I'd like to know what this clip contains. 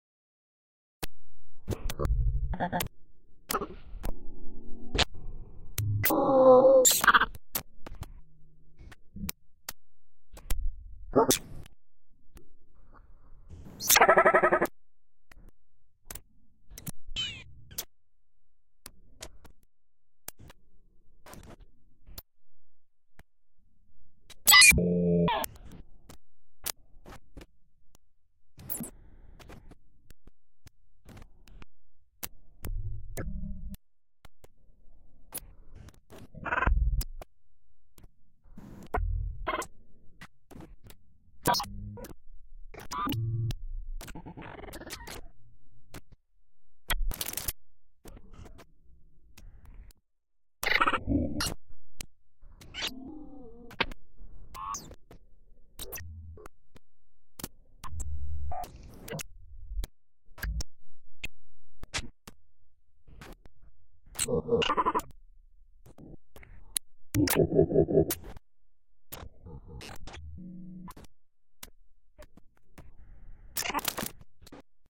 this is what seagulls sound like through dfx-Scrubby-VST's ears... Funny shkriekss here and there (remix of crk365's seagulls).
mangled, useless, funny